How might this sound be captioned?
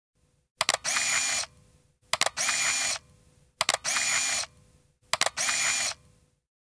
Kamera, taskukamera, pokkari / Camera, photo camera, small pocket camera, automatic, film, shots, shutter, click, interior
Pieni taskukamera, muutama laukaus automaattiasetuksella. Sisä.
Paikka/Place: Suomi / Finland / Helsinki, studio
Aika/Date: 2004
Camera, Field-Recording, Film, Filmi, Finland, Finnish-Broadcasting-Company, Interior, Kamera, Laukaus, Laukoa, Photo, Photography, Shoot, Shot, Shutter, Soundfx, Suljin, Suomi, Taskukamera, Tehosteet, Valokuvaus, Yle, Yleisradio